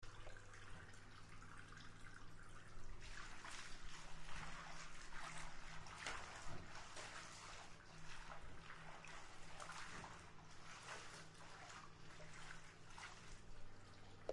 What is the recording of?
Whilst running the bath i swished the water around as if having a bath.